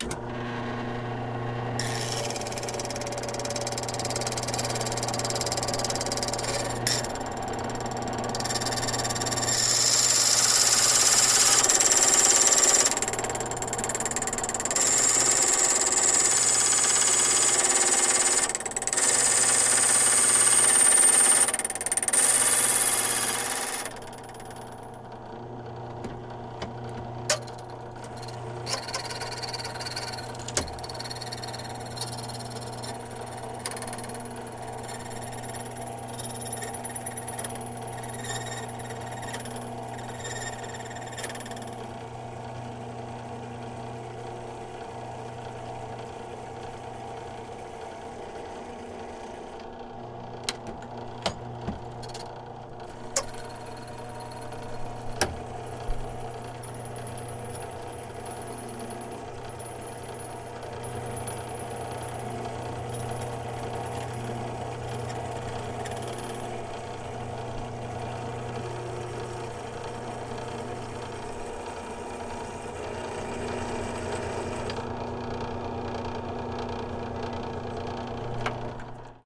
A key cutting machine, its pretty abrasive
Recorded with a me66 to sounddevices 722
abrasive, industrial